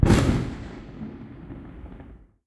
Explosion sound effect based on edited recording of fireworks on Bonfire Night circa 2018. Recorded using Voice Recorder Pro on a Samsung Galaxy S8 smartphone and edited in Adobe Audition.
fireworks, boom, bang, firework, explode, explosion, missile, war, bomb, rocket, gun